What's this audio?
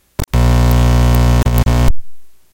This is the odd sound made when you disconnect a piece of equipment that you are recording from the recording device. Enjoy!